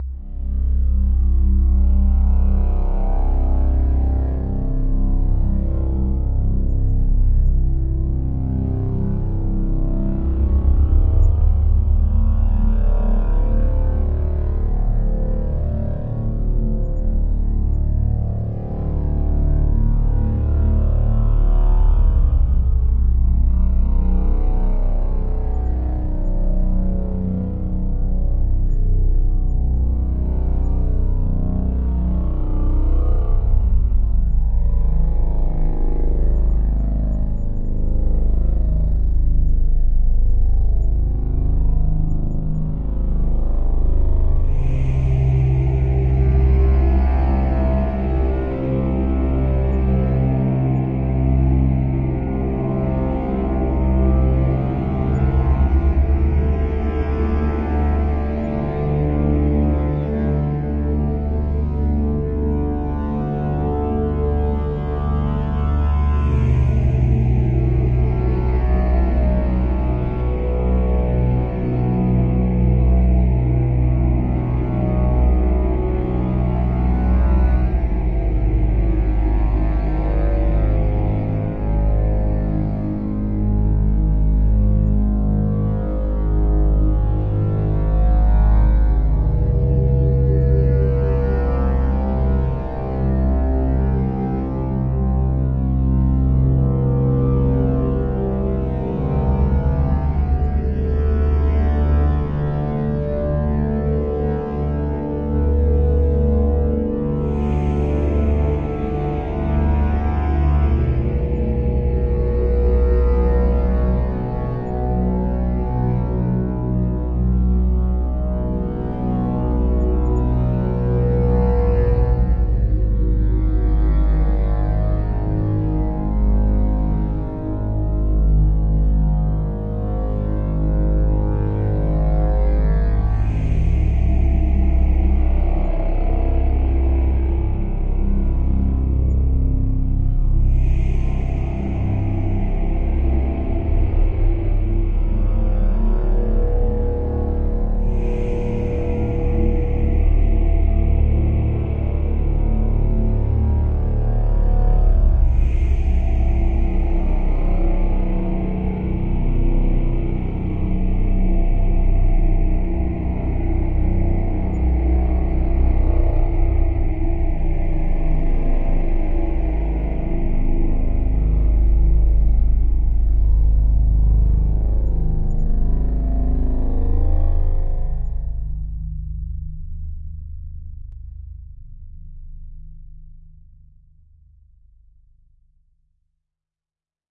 Drone horror music 02
Amb, Ambiance, Ambience, Ambient, Atmosphere, Atmospheric, Cinematic, Creepy, Dark, Drone, Eerie, Film, Horror, Movie, Scary, Sound-Design, Spooky